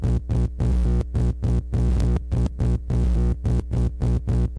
a basic low glitch rhythm/melody from a circuit bent tape recorder